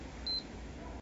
Sonido 6- play prendido
this is the sound of my playstation when i start it
play, station, start